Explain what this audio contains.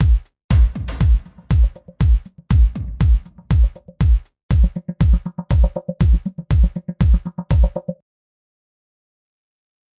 Don't Let Go LOOP 120 noSTAB noVOX
Created in Reason 10 with NN19 patches. 120 bpm 4416
120bpm, Reason10, LOOP